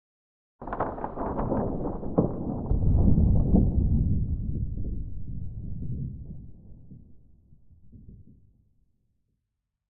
Thunder sound effect. Created using layered sound of rustling baking paper. Paper was pitched down, eq'd and had reverb added.
lightning, nature, storm, thunder, thunder-storm, thunderstorm, weather